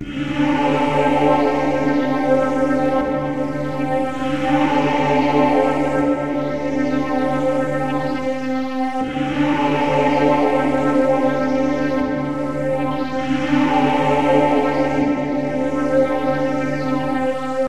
passing by
a semi religious sound with a modern small choir.Made with Ableton.